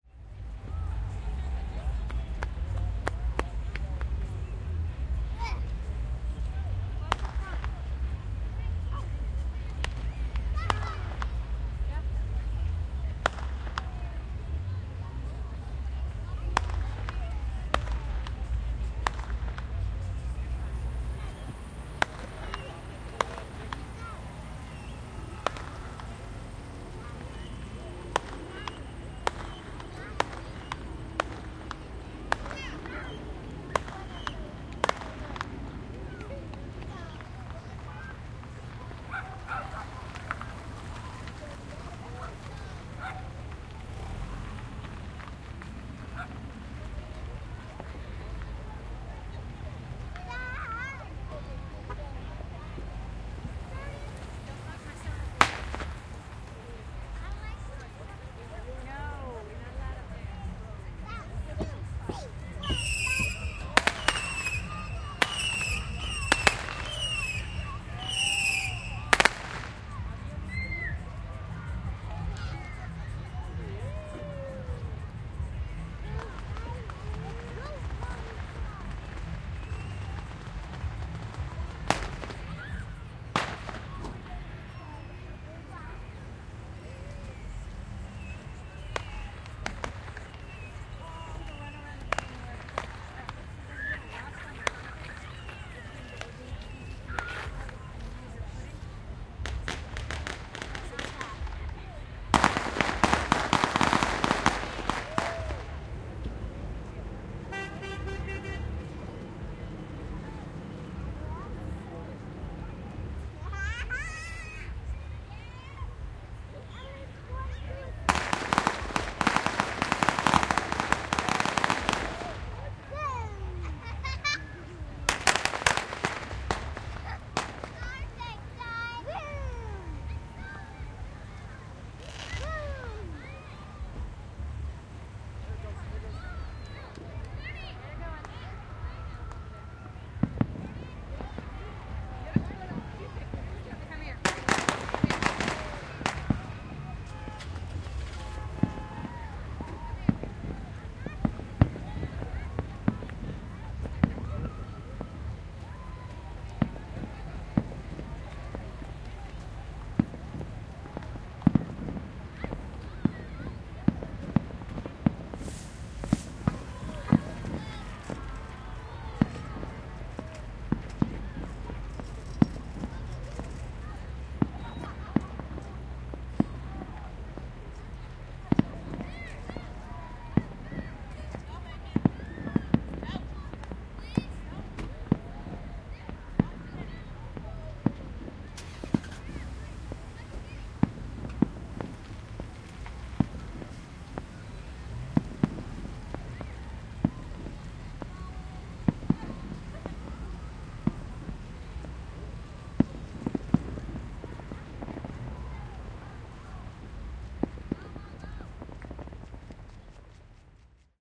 prelude 2 fireworks
4th of July, 2006. Personal fireworks, cars, crowd and misc. sounds. Many people were waiting to watch the fireworks at the Ballpark in Arlington TX. The display usually follows the game, but the game was delayed an hour and a half due to rain. Recorded in the parking lot of Sixflags mall (H360 and Division Street). Recorded with ECM-99 to SonyMD. The score: Toronto Blue Jays 3 - Texas Rangers 2
field-recording, fireworks